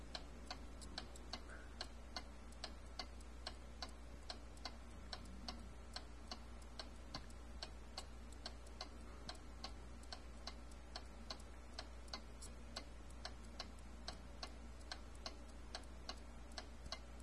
ticking clock 2
Small wooden clock ticking